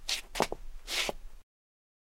Walking Zombie foley performance 2
Extended foley performance, zombies walking,for the movie "Dead Season."
foley, floor, step, walk, dead-season, shoe